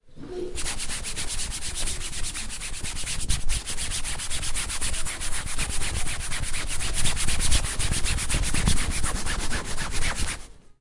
mySound MES Ona
Barcelona, Mediterania, Spain, mySound